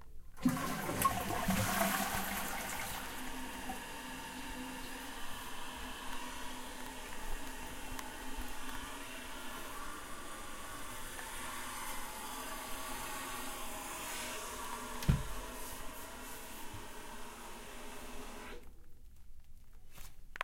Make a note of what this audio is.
Sonic Snap Sint-Laurens
Field recordings from Sint-Laurens school in Sint-Kruis-Winkel (Belgium) and its surroundings, made by the students of 3th and 4th grade.
Belgium, Ghent, Sint-Kruis-Winkel, Sint-Laurens, Snap, Sonic